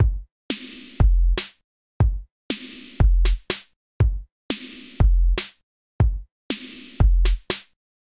minimal drumloop no cymbals
acid, beats, club, dance, drop, drumloops, dub-step, electro, electronic, glitch-hop, house, loop, minimal, rave, techno, trance